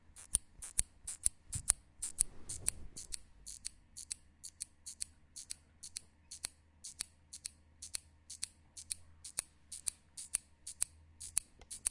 push a clothe dryer clip
sound, city-rings, field-recordings